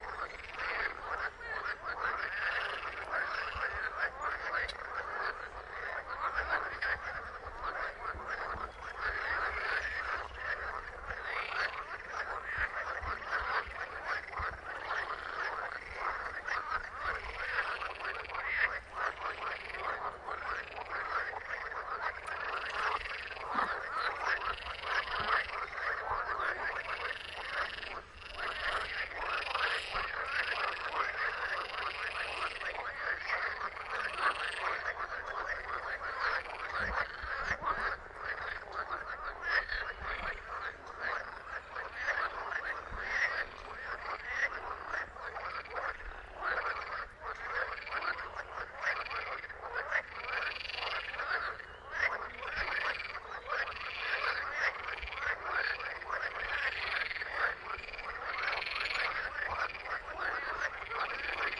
Atmo Froschteich
croaking, frogs, pond, swamp, toads